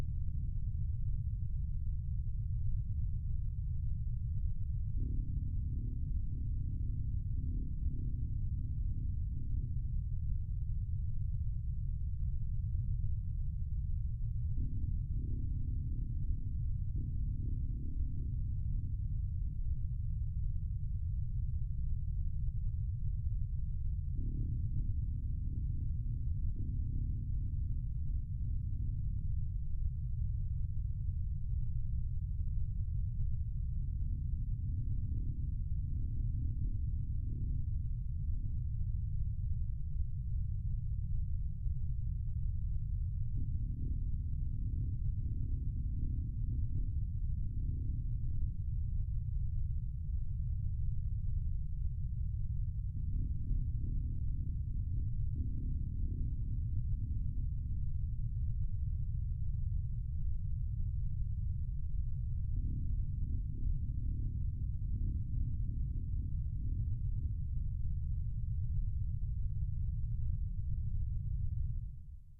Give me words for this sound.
sh Machine murmur 1
Developed for use as background, low-level sound in science fiction interior scenes. M-Audio Venom synthesizer. Low rumble plus random synth "machine chatter".
machine, M-Audio-Venom